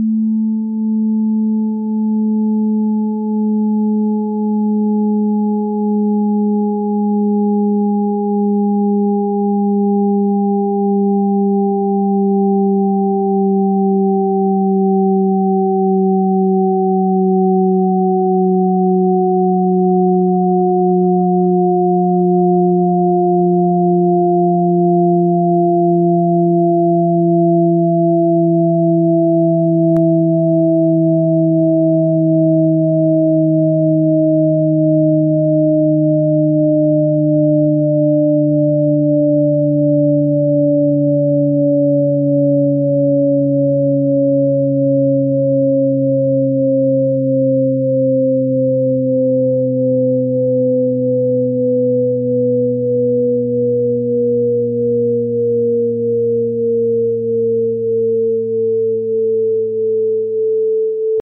A series of three octave tones dropping in pitch, with the highest one fading in to create a dropping effect ending at a higher frequency.
Just my attempt to recreate the dropping bells effect. Start the sound over from the beginning after finishing.
illusion, phase, sine